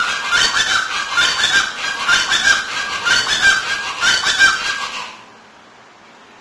Ortalis vetula, wild birds in the Kabah park, cancún city